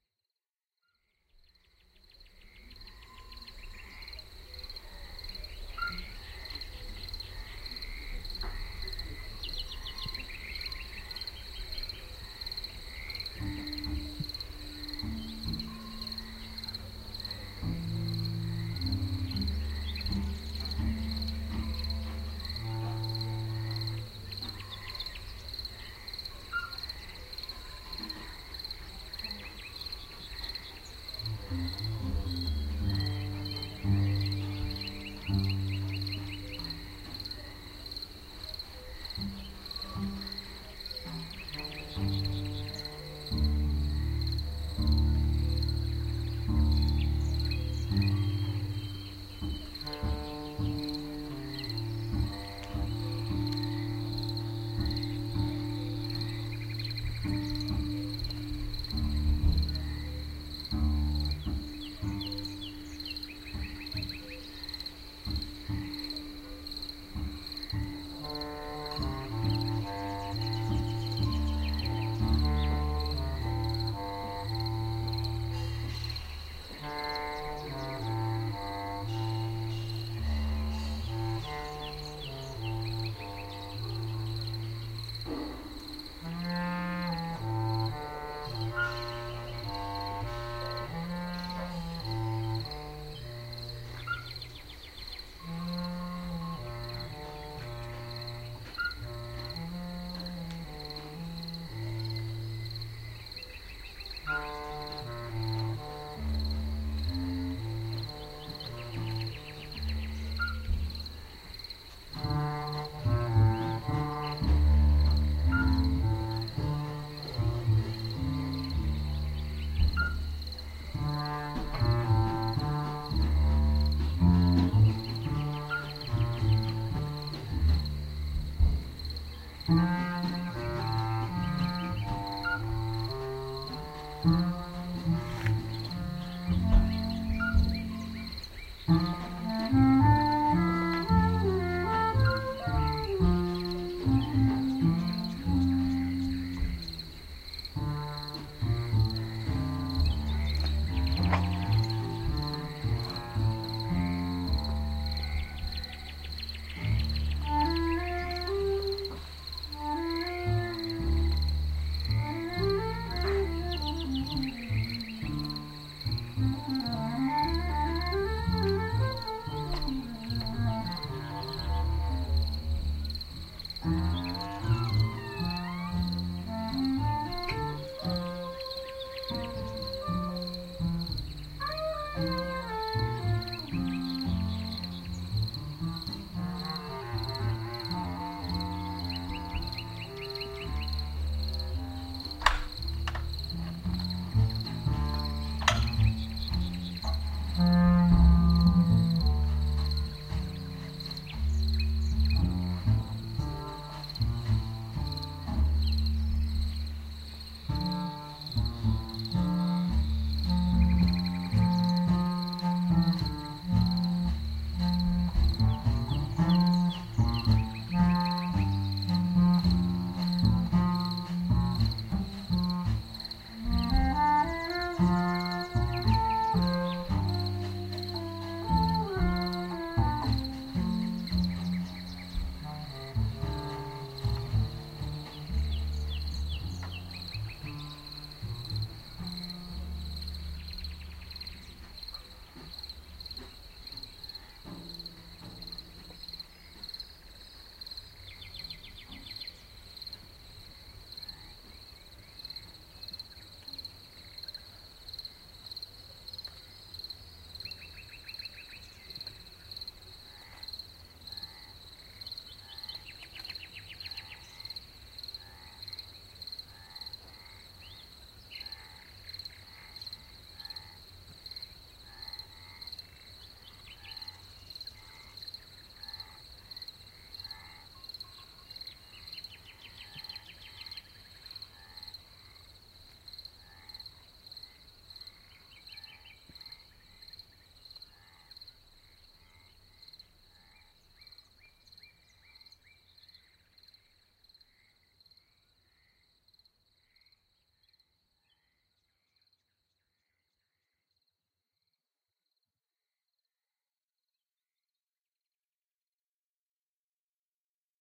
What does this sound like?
summer night in the middle of france. Jazz musicians playing inside a french farm. I record the nature sound outside with nagra IVs & Schoeps mk5.
farm, field-recording, nature, night, summer